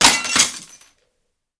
Picture frame dropped on wood floor, shatters
A picture frame dropping to the ground and shattering
floor, smash, drop, picture, break